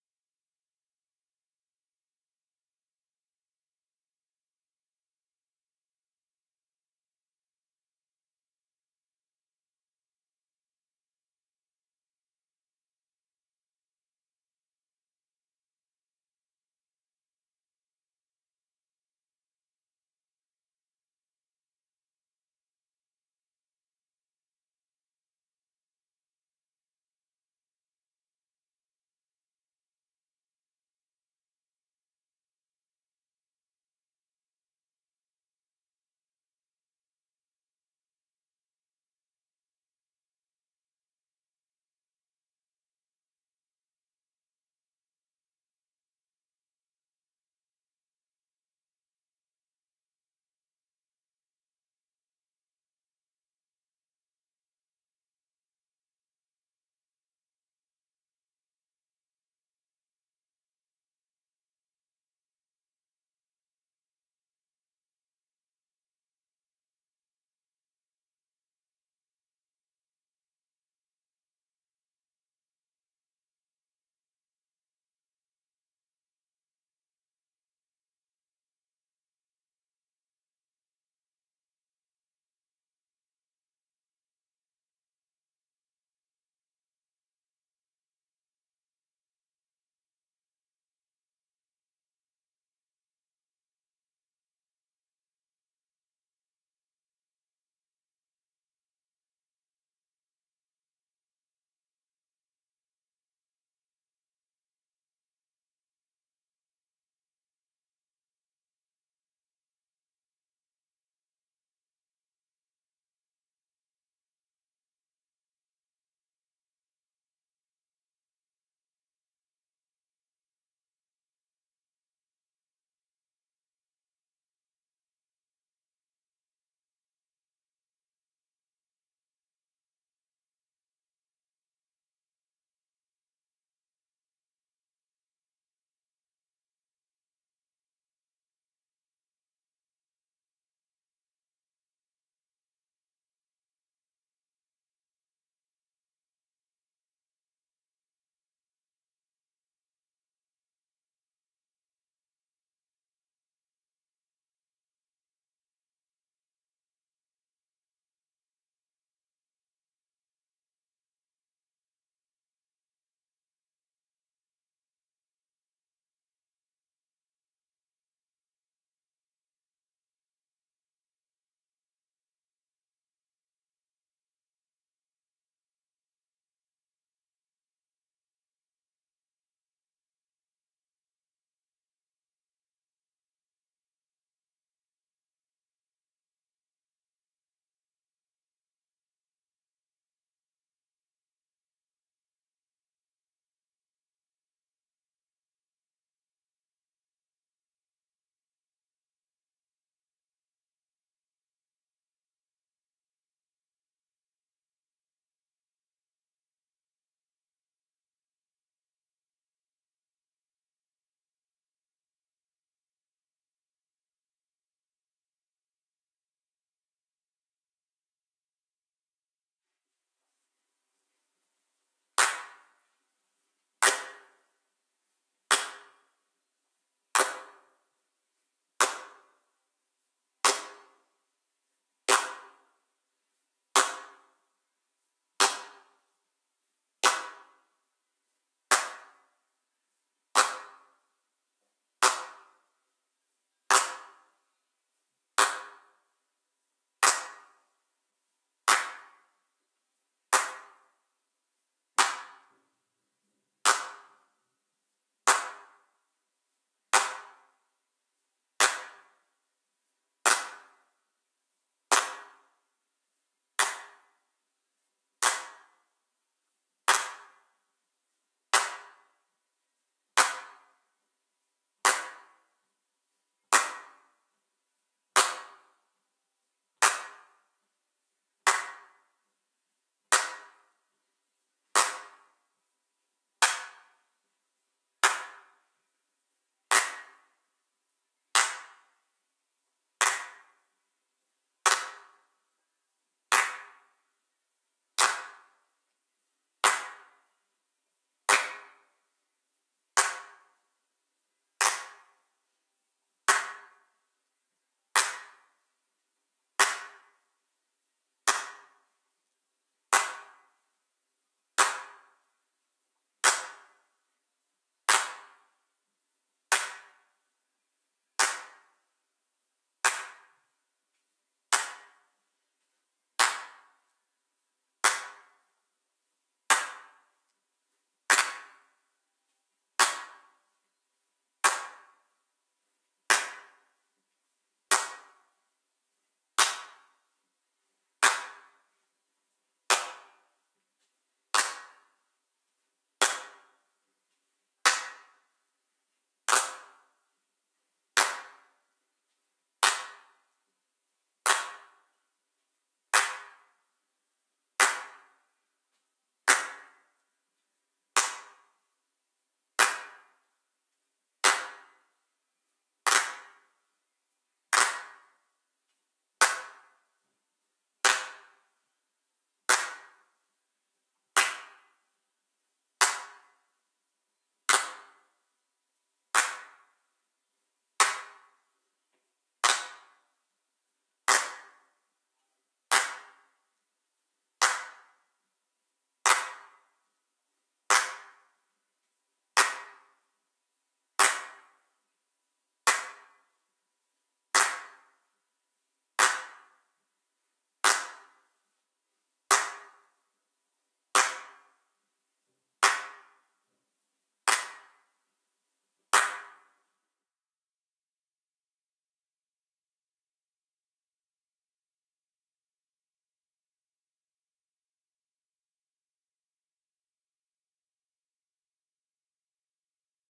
I made a drum recording of We are the world, and this is the handclap track for it. I made some different takes and mixed together. Lots of reverb.
There is also a tambourine track and drums track for the song, synced.